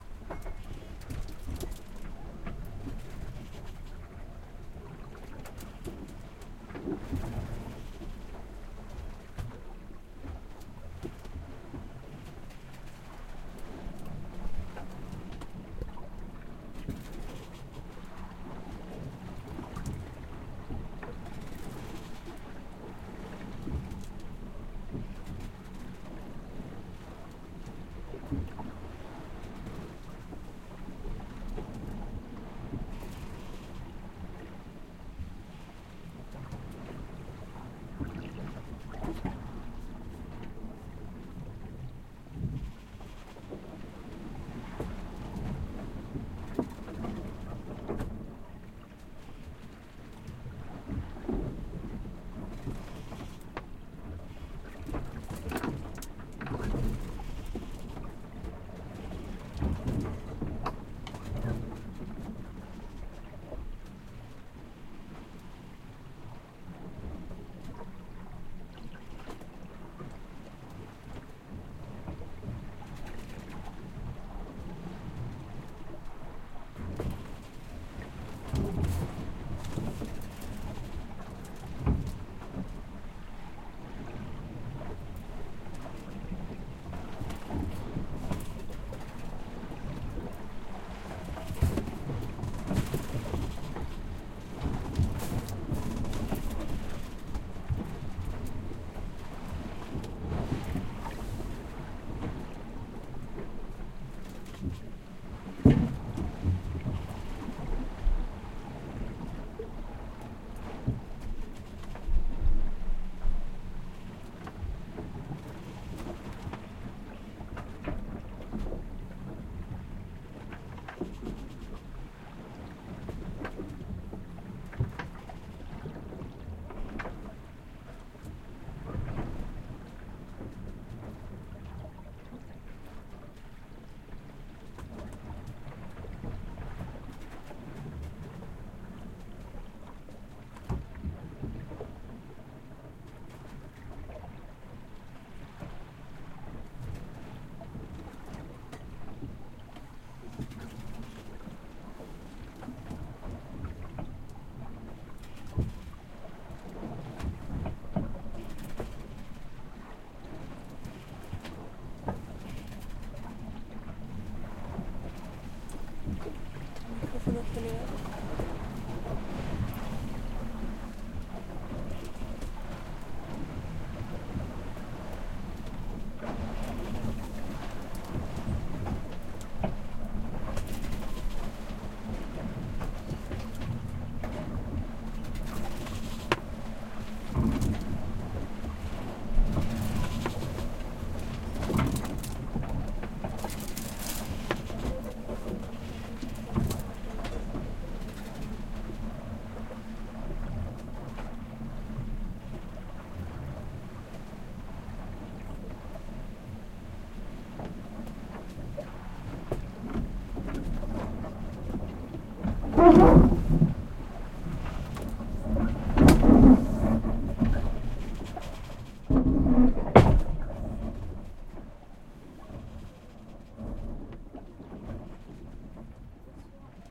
This is a field recording take inside a boat during a trip to Brazil in the atlantic ocean